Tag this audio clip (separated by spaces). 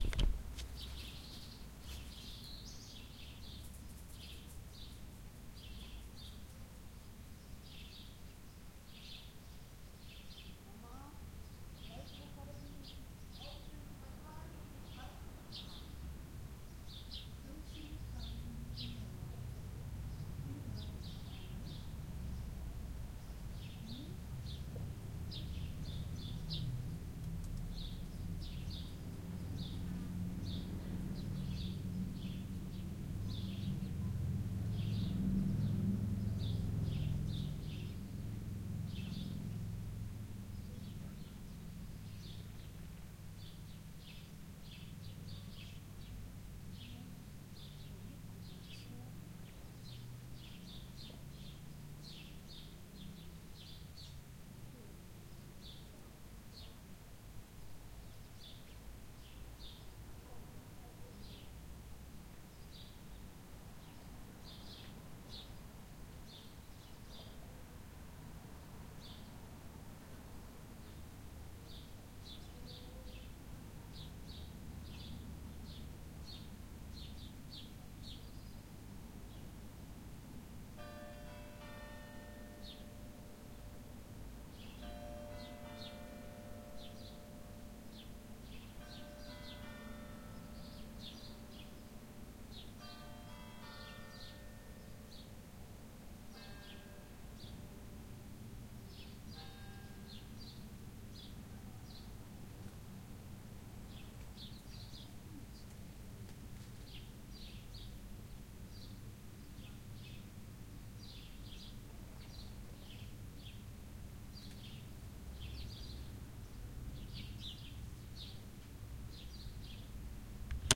Ambience Garden Village